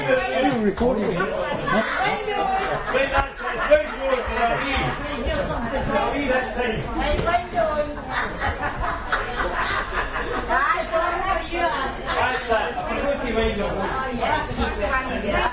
ambiance inside a Scottish pub (at 11 am), with voices of several people. A group of old ladies having gin and tonic stand out clearly. Soundman OKM > Sony MD > iRiver H120